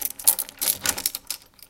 Lock the door (2)
Locking a door with a key. Recorded with a Zoom H2n.
close, closing, door, key, lock, locking, shut, unlock